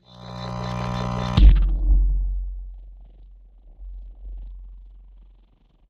MSfxP Sound 5
Music/sound effect constructive kit.
600 sounds total in this pack designed for whatever you're imagination can do.
You do not have my permission to upload my sounds standalone on any other website unless its a remix and its uploaded here.